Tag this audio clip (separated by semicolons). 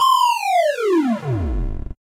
falling; game; movie; film; animation; fall; video